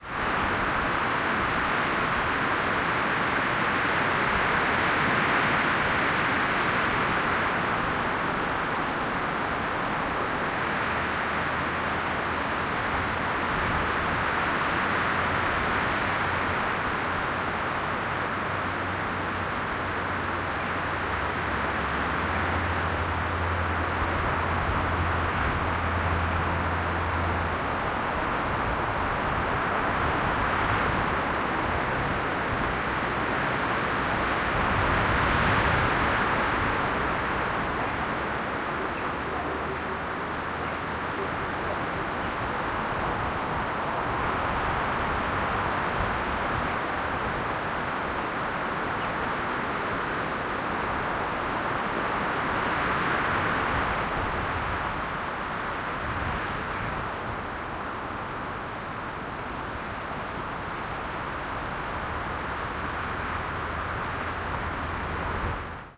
Large ambiance of "mistral wind" blowing in pine trees. Some distant traffic.From various field recordings during a shooting in France, Aubagne near Marseille. We call "Mistral" this typical strong wind blowing in this area. Hot in summer, it's really cold in winter.
mistral, pine, strong, trees, wind